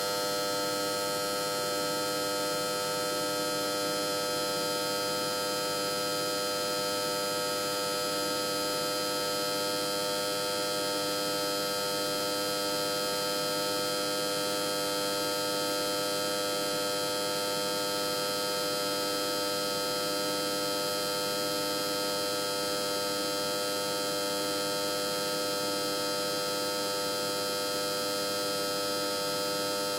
Sound of working Electric Power Transformer
shock, power, resistance, winding, sparks, Transformer, crackle, industry, current, induction, clicks, voltage, electric, electricity, energy, danger